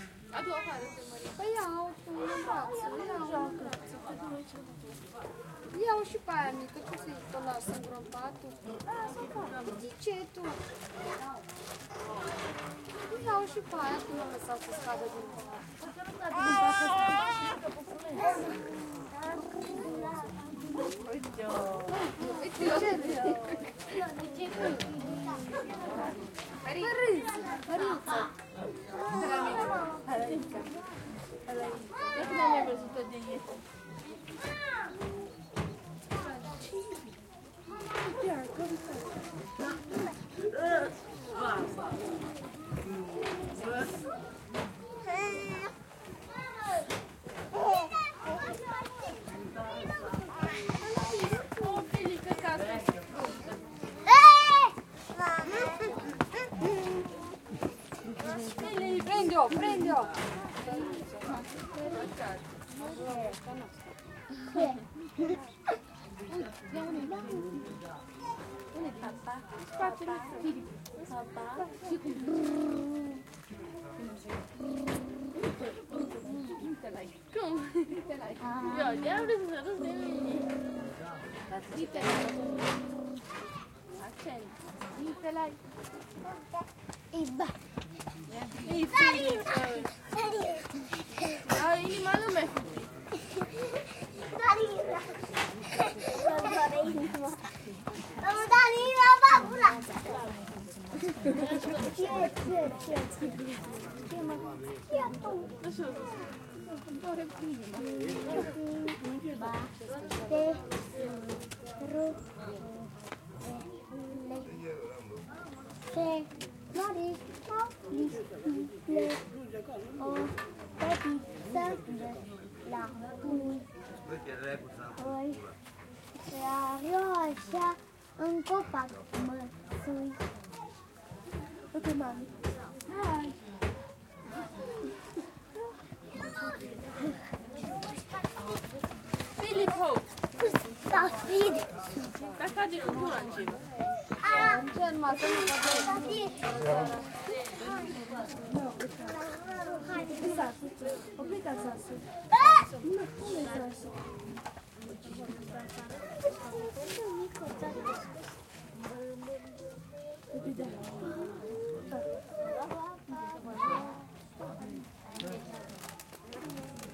201007 Tichindeal GypStl RoxanasHut Evening st
An early autumn morning in a settlement of Roma gypsies the Transsylvanian village of Țichindeal/Romania, basically just two mud roads with about 50 or so hovels in various states of disrepair.
The recorder is standing in the middle of the settlement, some women from the neighboring hovels are passing around, cuddling and admiring the recently born daughter of a neighbor as children run by shouting and chasing each other.
Other residents can be heard talking and working in the background.
Recorded with a Rode NT-SF1 and matrixed to stereo.
baby, Roma, people, gypsy, talking, village, Romania, rural, field-recording, countryside, ambience, Transsylvania